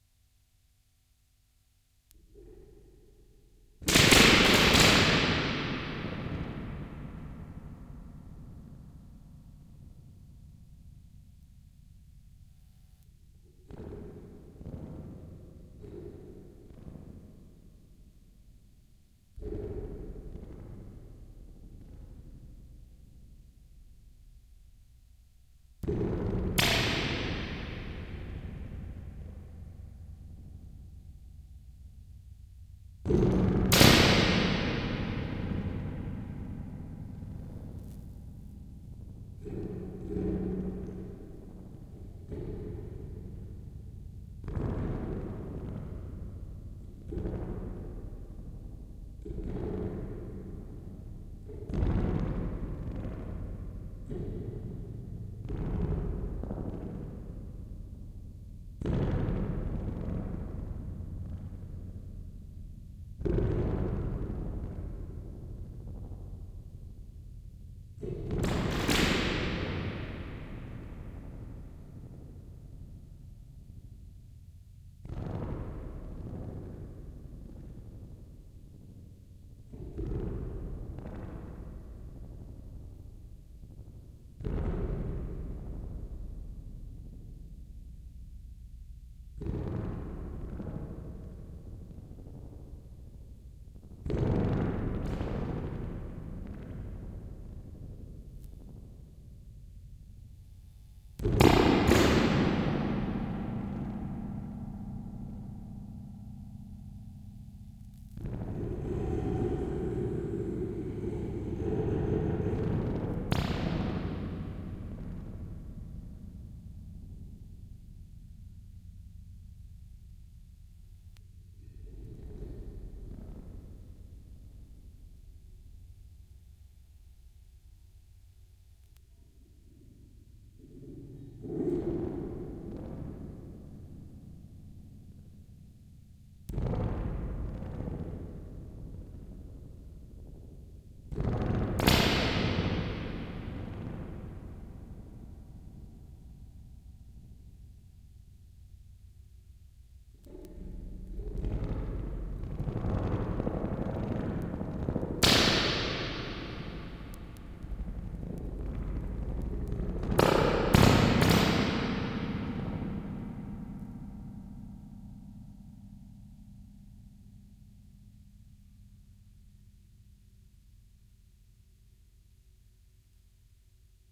0001 Spring Hit
I opened my Fostex 3180 spring reverb and hit the springs.
Useful for that dub track of yours.
Fostex, Reverb, Spring, Analog, 3180